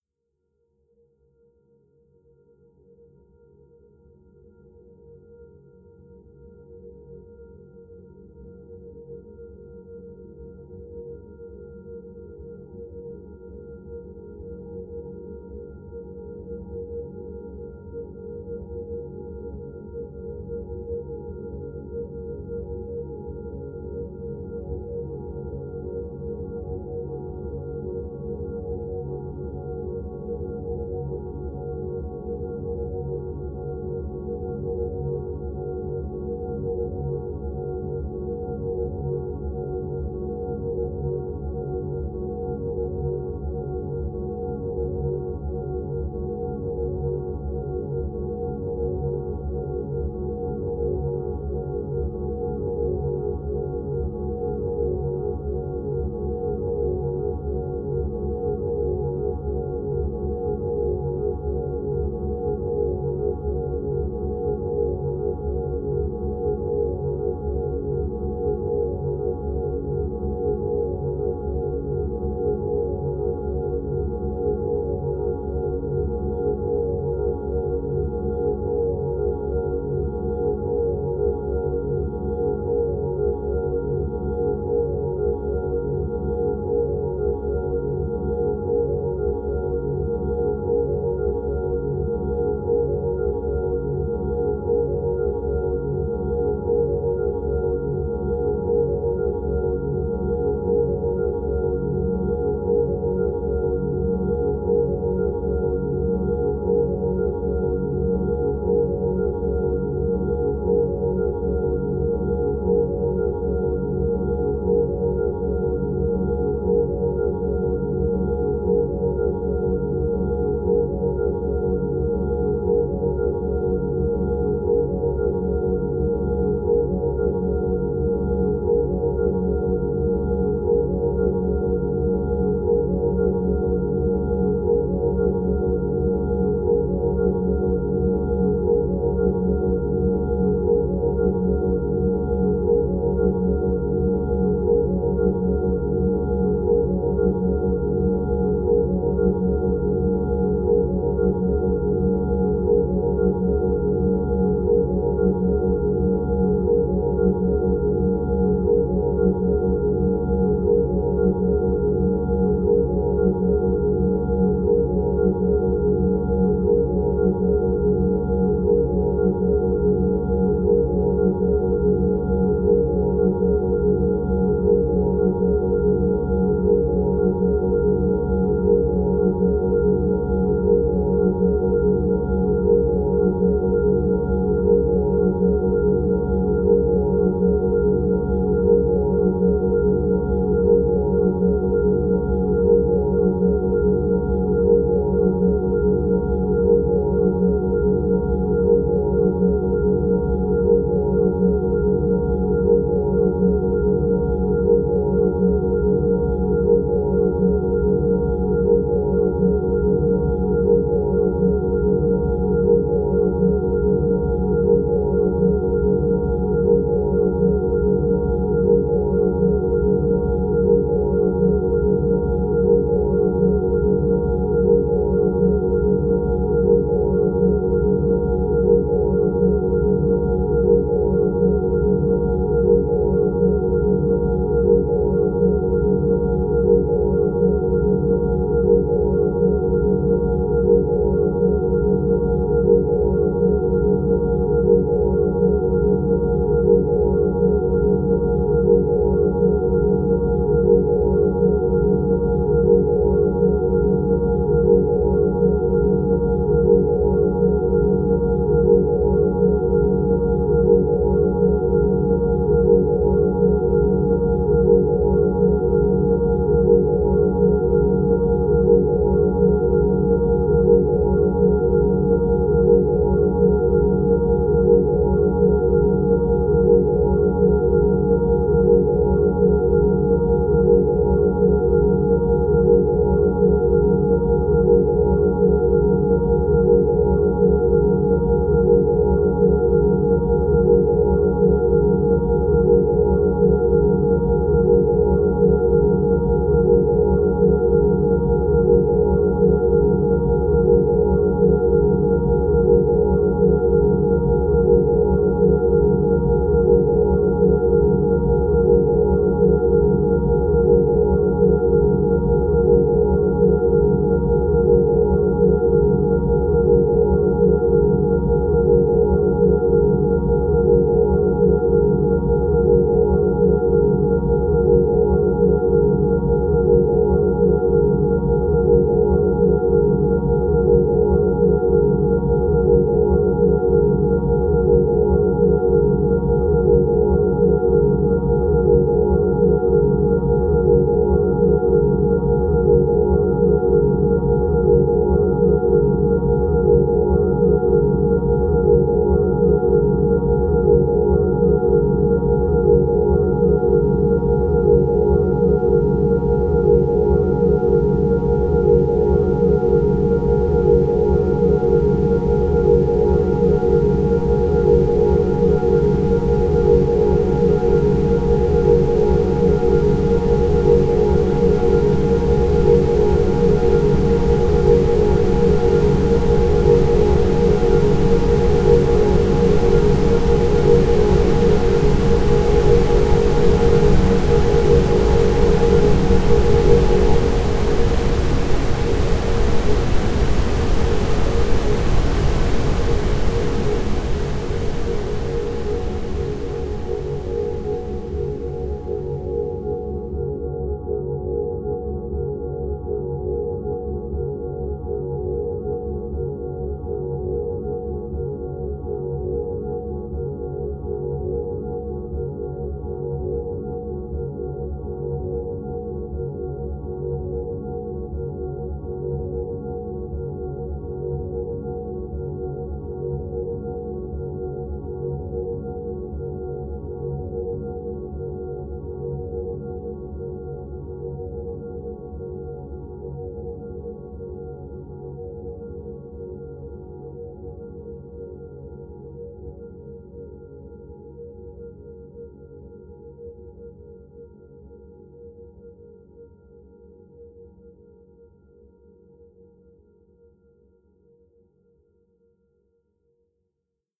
Day 9 9th July Corsica S sinouft
Day 9. Very nice twinkling glow.
Edited in Audacity.
This is a part of the 50 users, 50 days series I am running until 19th August- read all about it here.